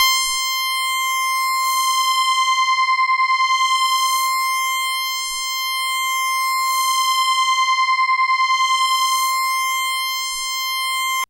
Soundsample from the Siel Opera 6 (Italy, 1982)
used for software samplers like halion, giga etc.
Sounds like the 8bit-tunes from C64
Note: C6
6, analog, c64, keyboard, opera, sample, samples, synthie